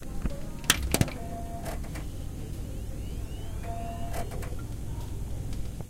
006 computer start
This sound is a recording of a computer starting to work.
It was recorded using a Zoom H4 recording device at the UPF campus in the 003 aula from tallers.
button, campus-upf, computer, office, start, UPF-CS12